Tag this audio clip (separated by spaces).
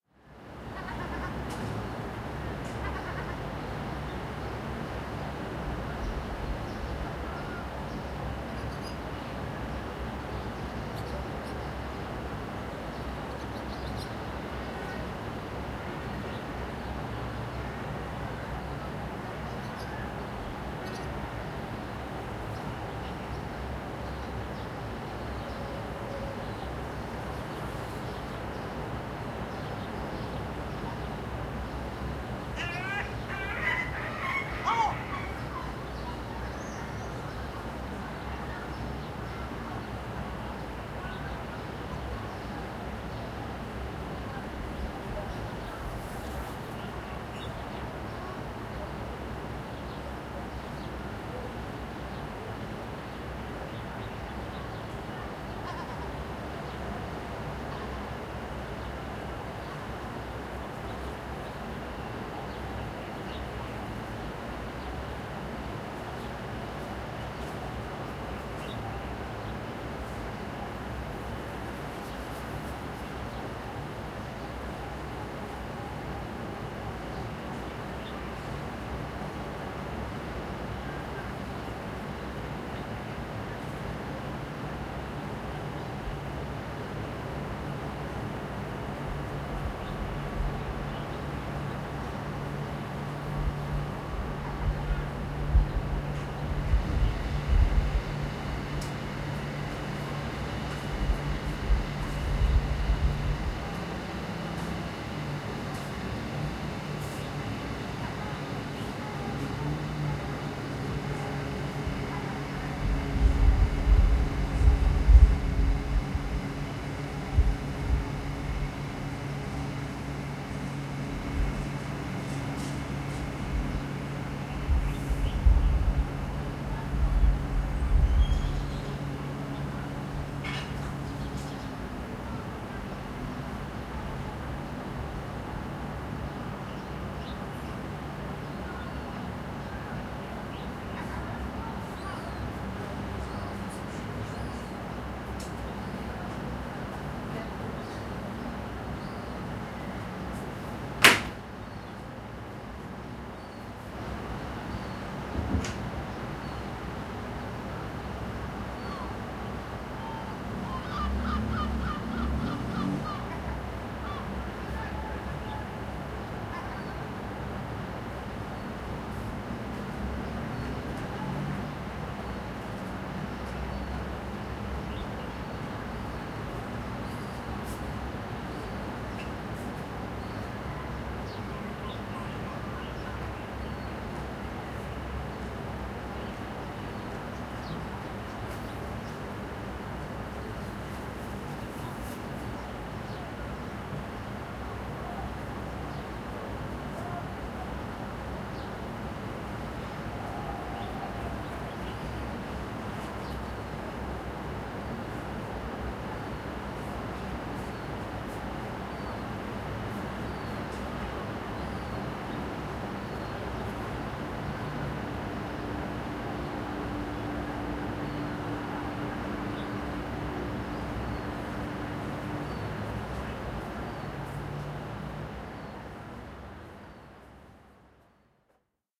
Agadir ambience car child Morocco motorbike passing passingby people shouting street talking